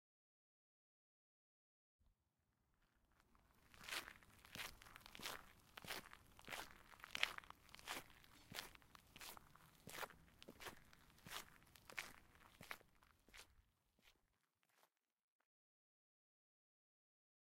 Walk - Gravel
Walking on gravel
Czech, CZ, Panska